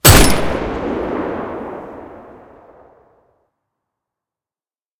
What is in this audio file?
1911 Comedian Pistol
LOUD!!!!! Here's another 1911 pistol sound designed to sound like the one the Comedian used in Watchmen. Enjoy.